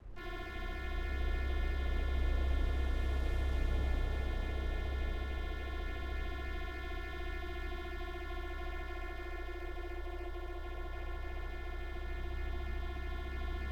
A few high quality ambient/space sounds to start.